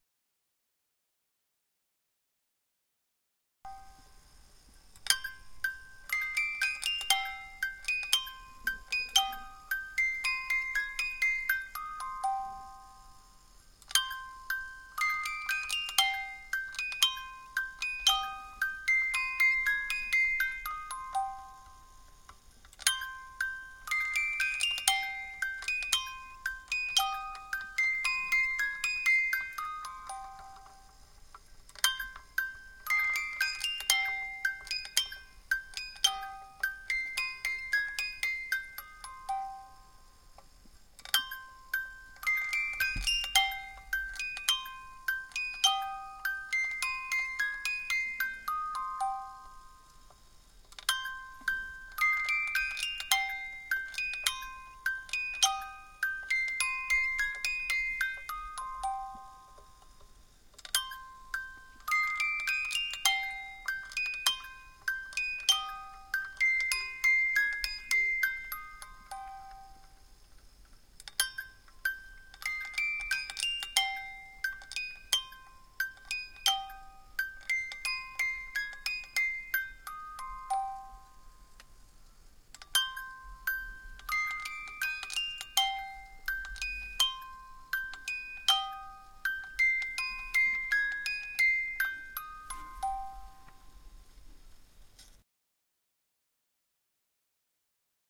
MrM MusicBox BlackSwan Ceramic
Music box revolved on a 12" x 12" ceramic tile.
Edited with Audacity. Recorded on shock-mounted Zoom H1 mic, record level 62, autogain OFF, Gain low. Record location, inside a car in a single garage (great sound room).